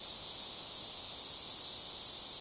Dither Noise
Noise left after dithering and phase canceling a tone.
A tone was created with Audacity's tone generator.
Another version of the tone was made by downsampling and dithering the original tone in Ableton Live.
I then phase cancelled the two sounds by switching the polarity of one of the tones and blending them back together in Audacity.
Because of the phase canceling, none of the original tone can be heard. Only the noise added during dithering remains.
Dither, Noise, Digital-Artifact